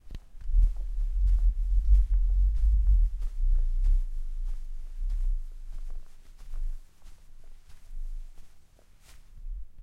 Footsteps on grass medium pace daytime outdoors
OWI, grass, outdoors, slow, place, lawn